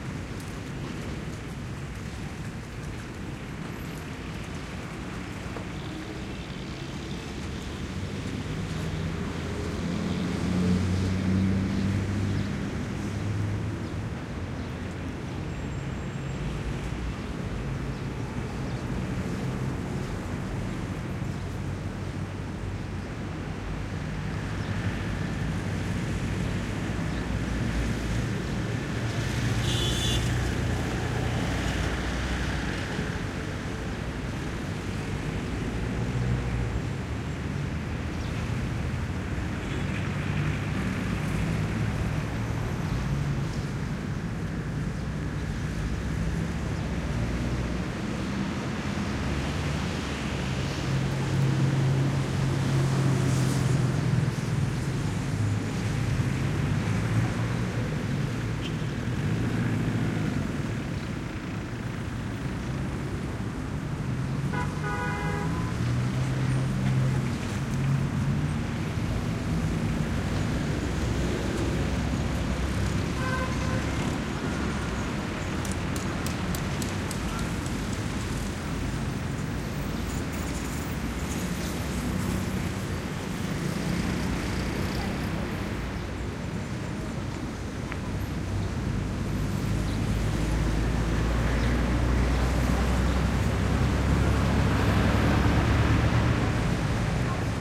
traffic heavy dense boulevard Dakar, Senegal, Africa